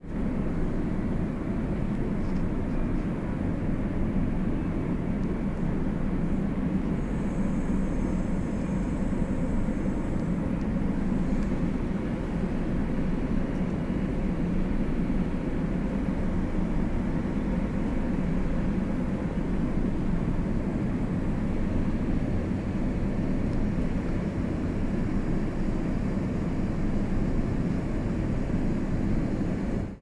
Store Cooling System Ambiance2
ambience, can, checkout, clink, clunk, cooling, crinkle, food, produce, store